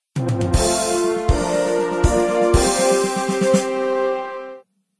This is a sound you can use in a video game when completing a level :D